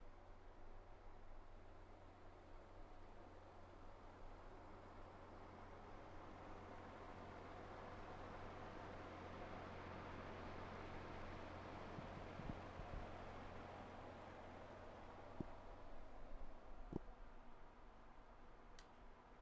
a fan - take 01
background-noise, vent, fan